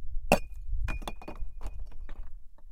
rock thrown off steep rocky cliff near Iron lakes just south of yosemite.
cliff, rock, throw